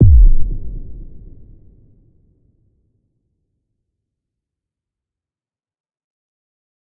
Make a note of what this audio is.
| - Description - |
Hard hitting sub bass sound
| - Made with - |
Harmor - Fl Studio.
For film projects or whatever I wanted.